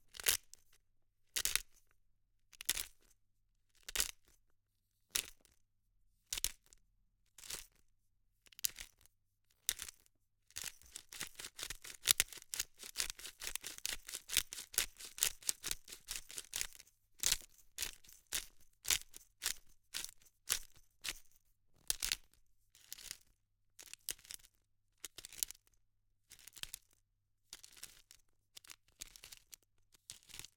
Grinding peppercorns in a pepper mill.
crunch, grinding, grinding-pepper, pepper-corns, pepper-grinder, pepper-mill